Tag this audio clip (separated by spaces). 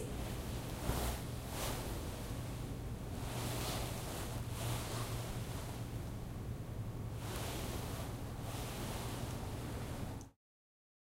dress foley moving satin